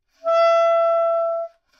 Clarinet - E5 - bad-attack-multiphonic
Part of the Good-sounds dataset of monophonic instrumental sounds.
instrument::clarinet
note::E
octave::5
midi note::64
good-sounds-id::3369
Intentionally played as an example of bad-attack-multiphonic
clarinet, E5, good-sounds, multisample, neumann-U87, single-note